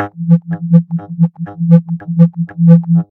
This sound composed of three track. The first one is a wave with a frequency of 440 Hz whose the pitch was decreased. The second track is a pluck which was repeat and the tempo was speed up. And the third track is a click track.